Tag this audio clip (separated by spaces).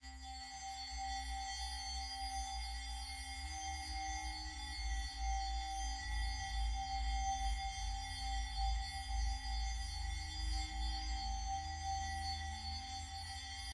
beats sounds weird